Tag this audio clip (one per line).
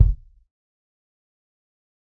punk
tonys
kit
kick
raw
dirty
tony
drum
realistic